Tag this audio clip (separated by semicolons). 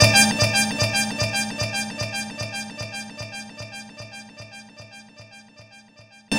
echo; flare; horn; horns; soul; stab; trumpet; trumpets